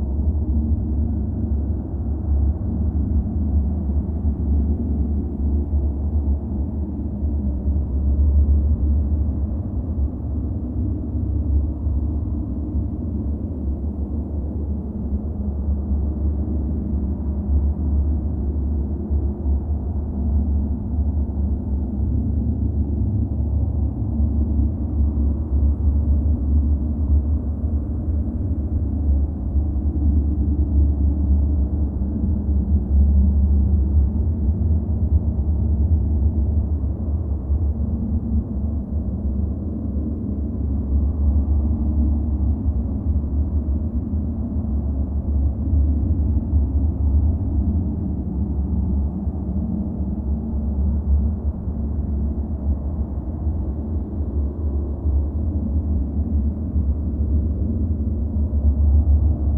archi soundscape cavern3
Instances of Surge (Synth) and Rayspace (Reverb)
Sounds good for cavern soundscapes.
ambience, cave, ambient, air, atmosphere, airflow